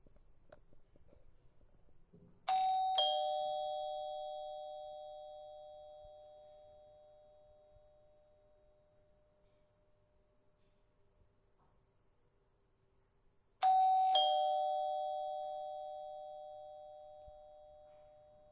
Door bell, it sounds two times. Sound Recorded using a Zoom H2. Audacity software used by normalize and introduce fade-in/fade-out in the sound.
Bell, Door, UPF-CS12, entrance, home, house